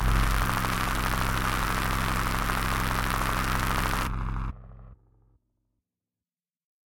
Trap Digital Synthesised Vinyl
and Description
Name:
Your should at least have 3 tags...
Tags: dubstep, trap, sound-effect
Please join multi-word tags with dashes. For example: field-recording is a popular tag.
Description: Dub Siren Effect
Please, Effect, a, For, DescriptionName, tags, dubstep, least, tag, field-recording, Your, Dub, multi-word, have, 3, Siren, popular, dashes, trap, sound-effect, example